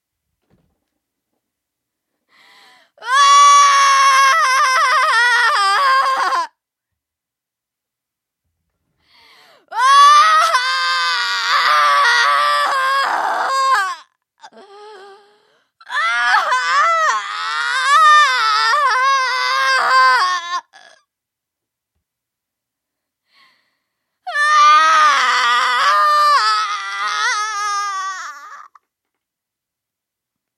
female dying scream
acting, cry, crying, dying, emotional, female, game, horror, hurt, sad, scared, scary, scream, screaming, shout, tears, upset, voice, whisper, worried